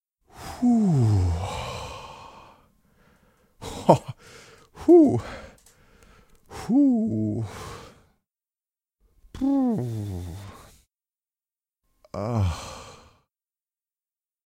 AS076768 Relief
voice of user AS076768
cheer, wordless, consolation, male, human, solace, relief, voice, comfort, vocal, man